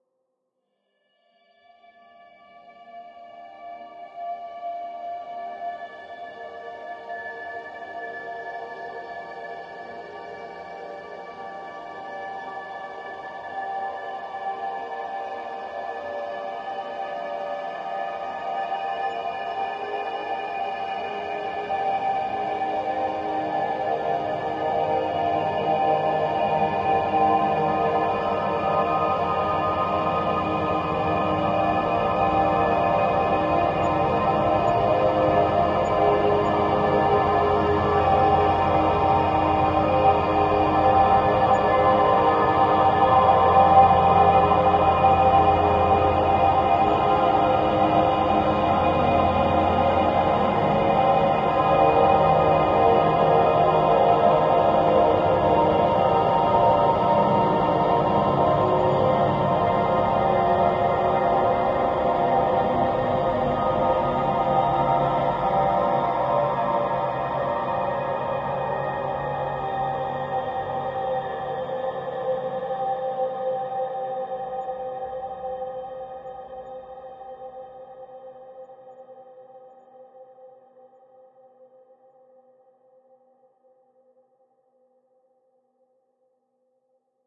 LAYERS 010 - Dreamdrone-62
LAYERS 010 - Dreamdrone is an extensive multisample package containing 108 samples. The numbers are equivalent to chromatic key assignment. The sound of Dreamdrone is already in the name: a long (over 90 seconds!) slowly evolving dreamy ambient drone pad with a lot of movement suitable for lovely background atmospheres that can be played as a PAD sound in your favourite sampler. Think Steve Roach or Vidna Obmana and you know what this multisample sounds like. It was created using NI Kontakt 4 within Cubase 5 and a lot of convolution (Voxengo's Pristine Space is my favourite) as well as some reverb from u-he: Uhbik-A. To maximise the sound excellent mastering plugins were used from Roger Nichols: Finis & D4.
artificial, soundscape, smooth, ambient, dreamy, evolving, multisample, drone, pad